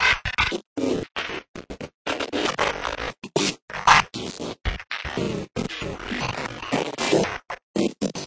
Sequence of tempo synced abstract lo-fi noises.
loop, drums, tempo, crunchy, noise, sync, lo-fi